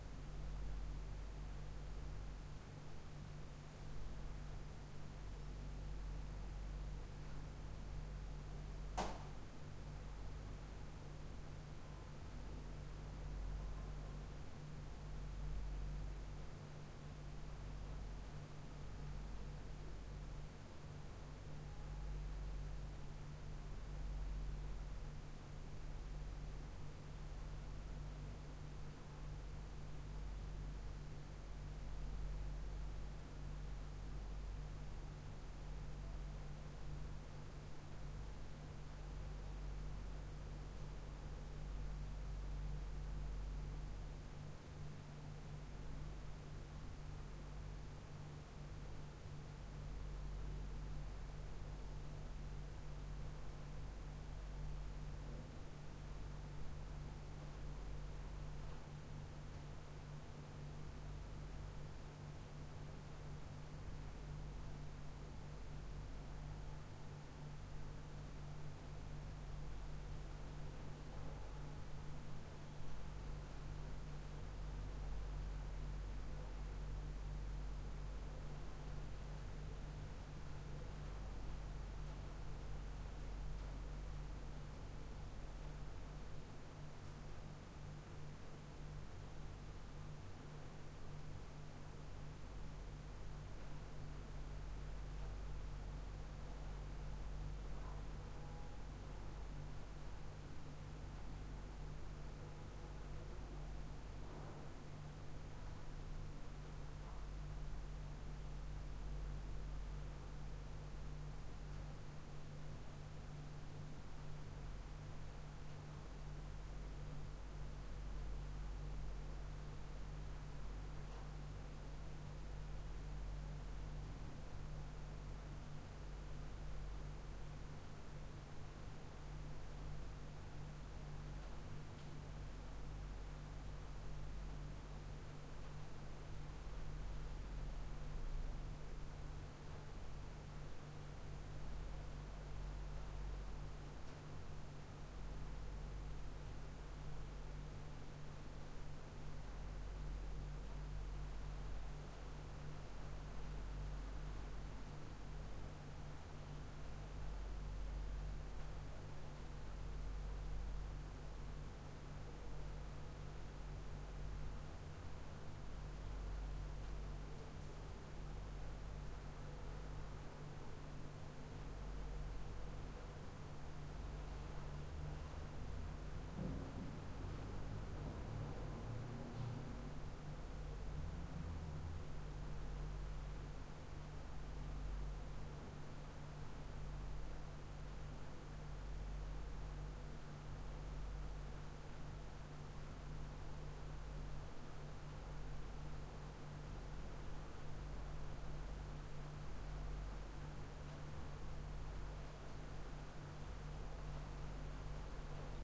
room tone hotel room quiet very distant voices and low throbbing electric hum maybe heat on Gaza 2016
hotel, quiet, room, tone